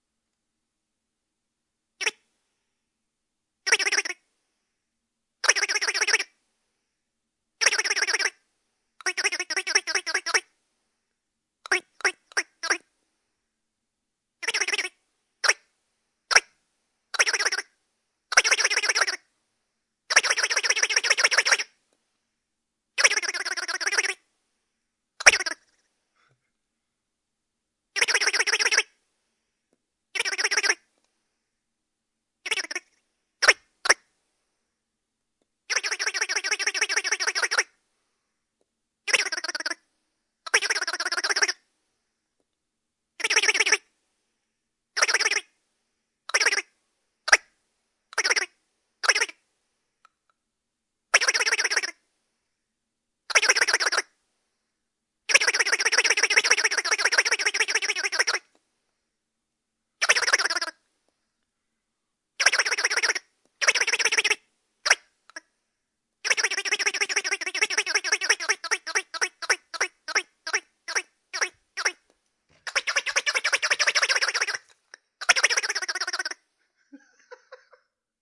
Ducktoy Quackers
This is a kids toy that you shake. Sounds like a duck or a cartoon character shaking their head back and forth really fast. Similar to the Squawky Talky duck but not prolonged. Really short back and forth bursts, but the same squeaky toy sound. Recorded on a Shure Super55 into the Zoom H6.